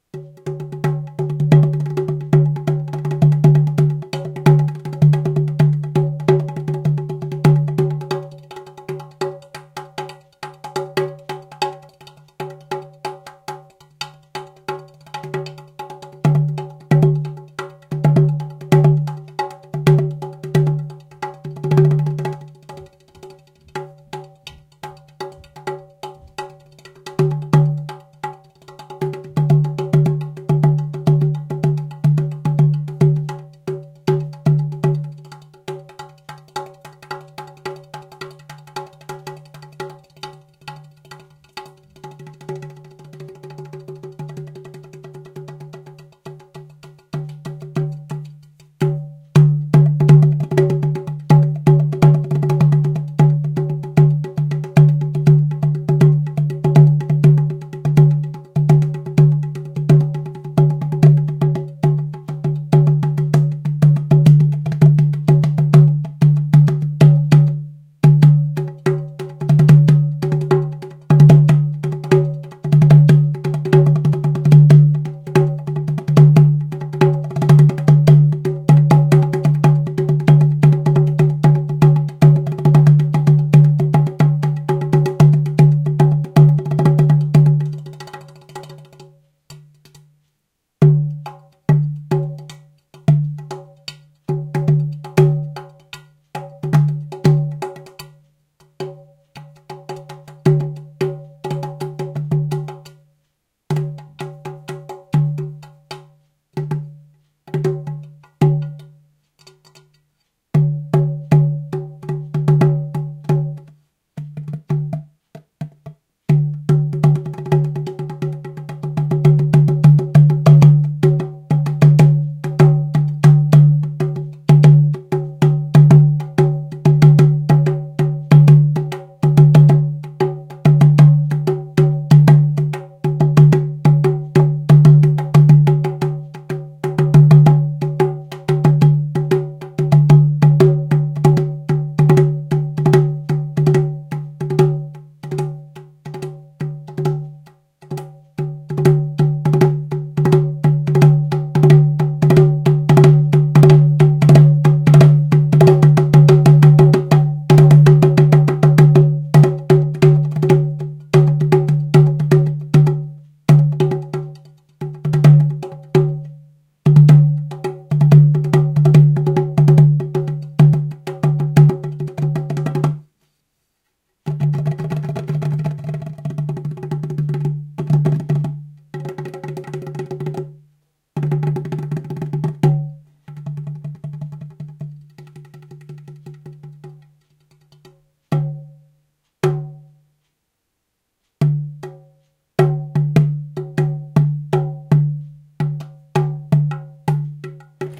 Ceramic Djembe Jamming Small Room
Improvising on an African drum. Should be OK to cut up, sample, loop. Placed the Zoom H2n recorder below it on the floor.